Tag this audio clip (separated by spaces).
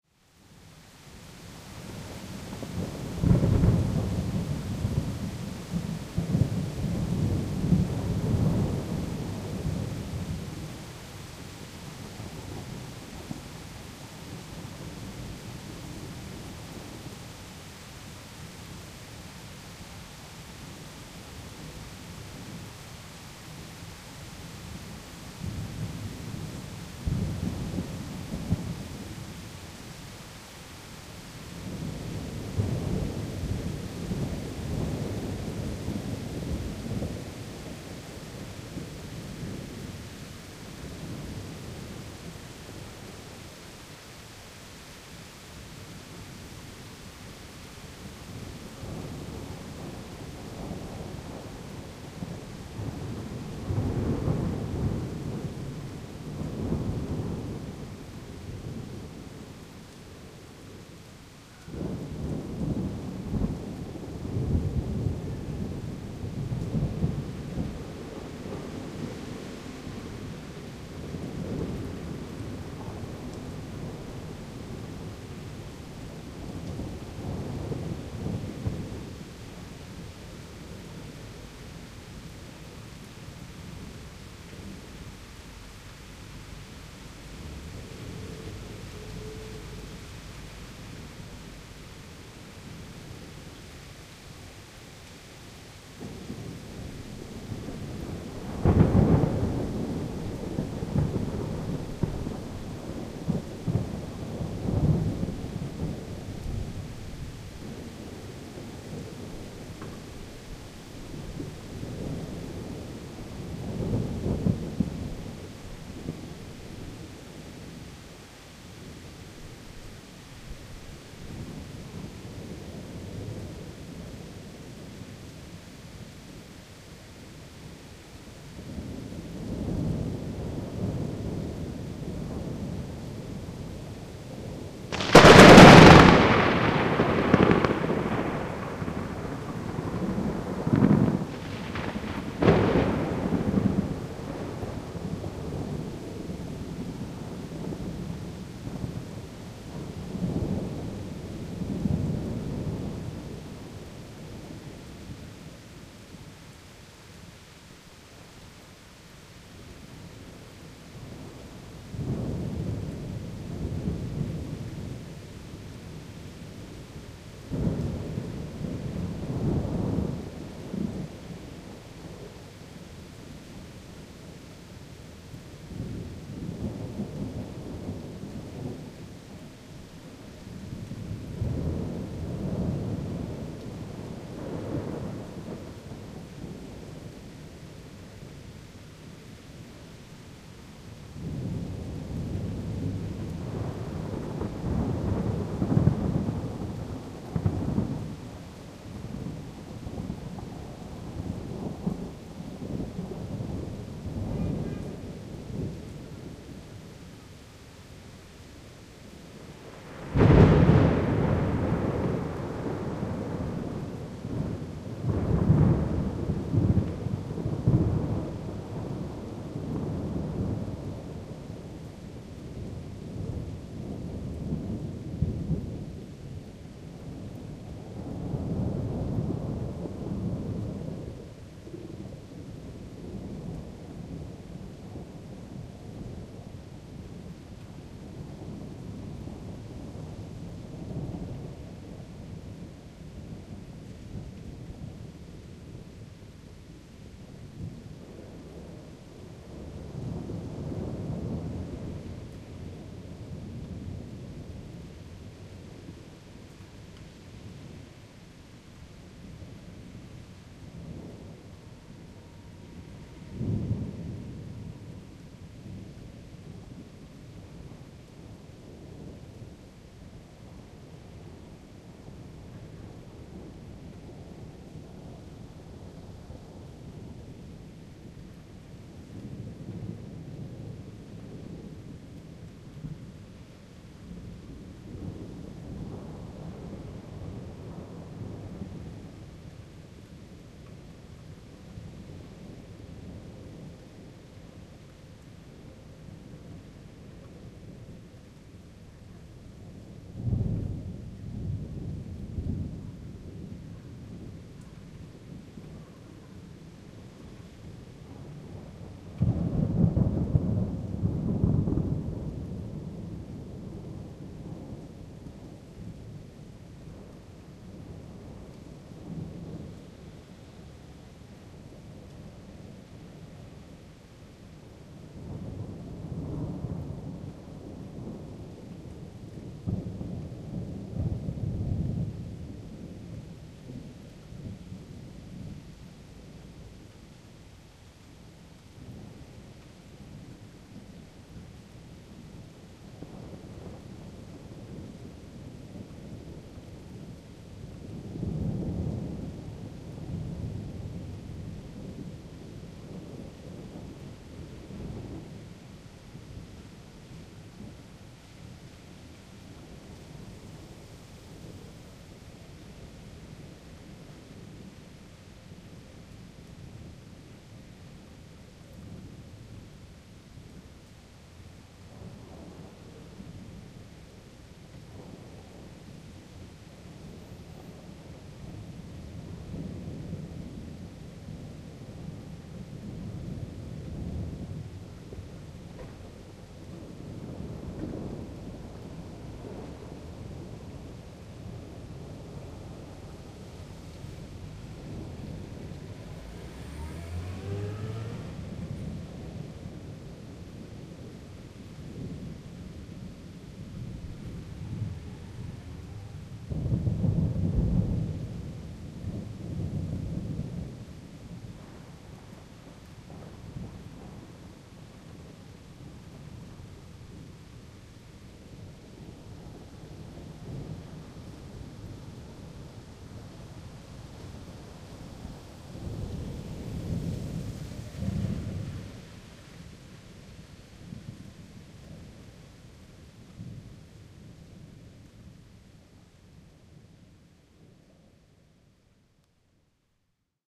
thunder field-recording rain storm nature thunderstorm berlin close-up